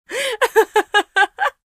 Woman Tickled
Authentic Acting of Laughter! Check out our whole pack :D
Recorded with Stereo Zoom H6 Acting in studio conditions Enjoy!